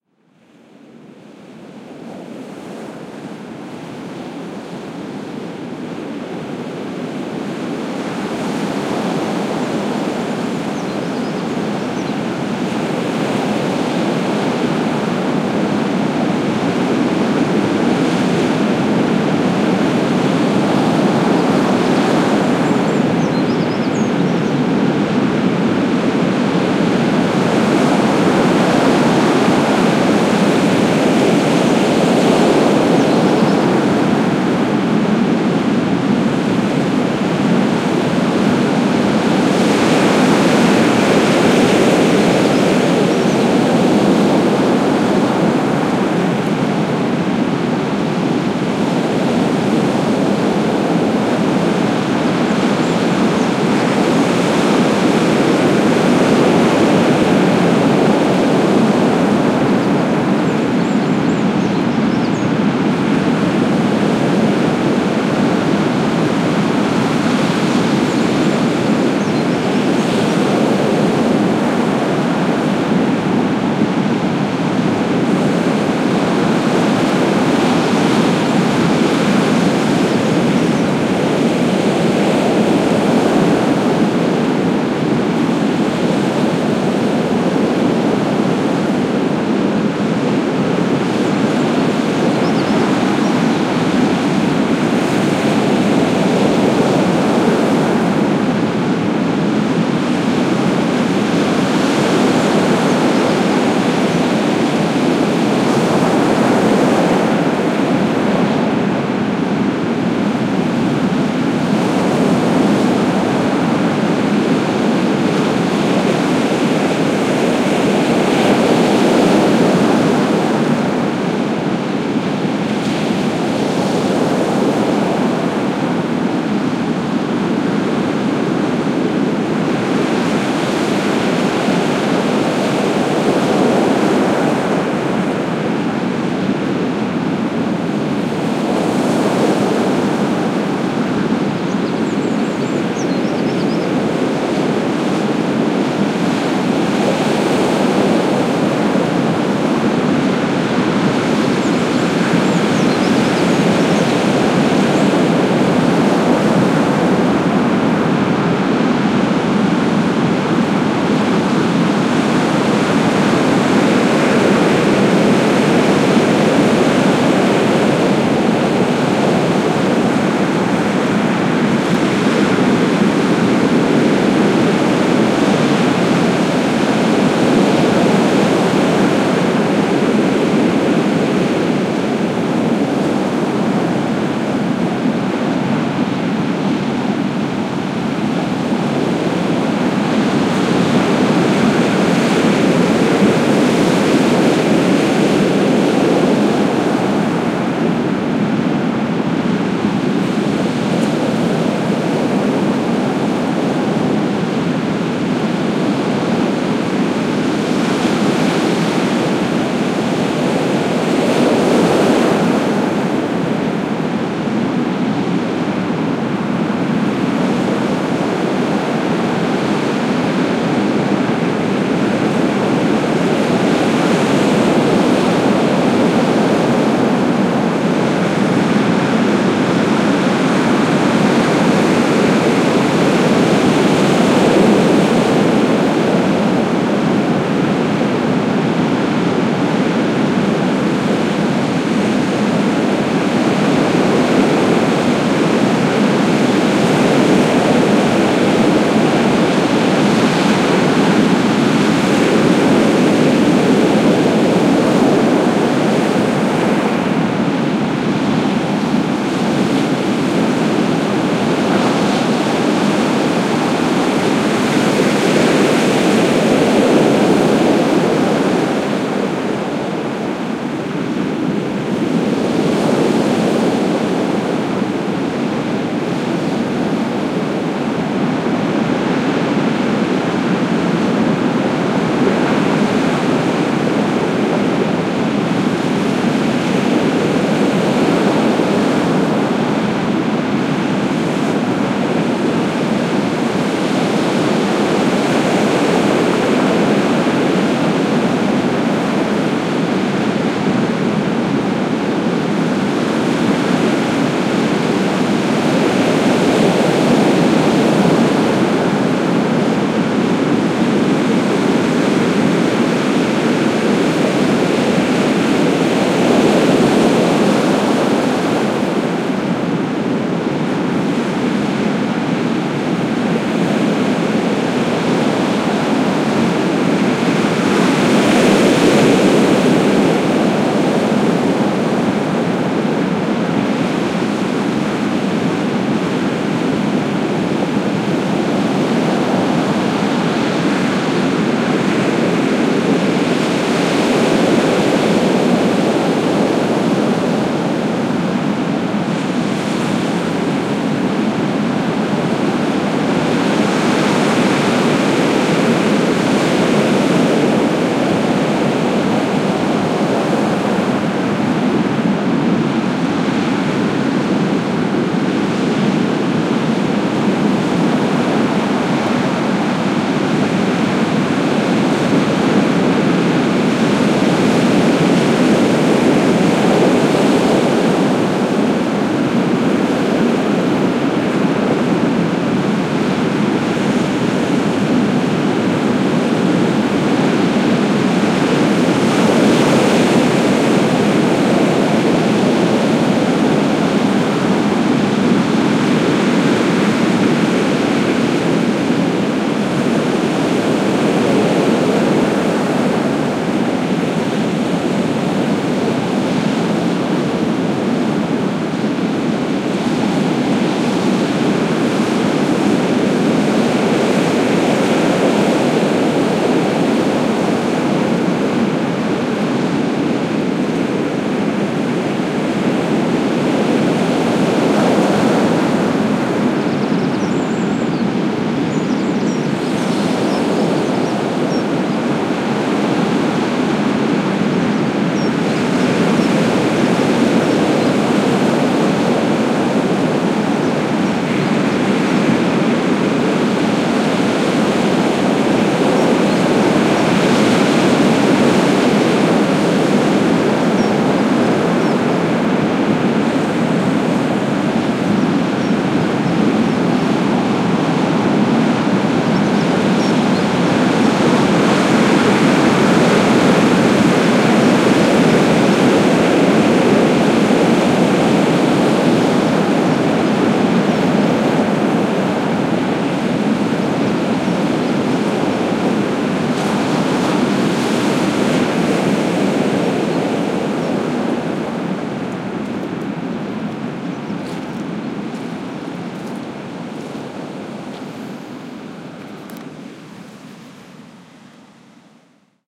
North Atlantic Waves
North Atlantic rollers on the Cornish coast in March. Some birds can be heard also. Lots of waves and sea.
coast, birds, woolacombe, ocean, gulls, kernow, cornwall, atlantic, sea, waves, north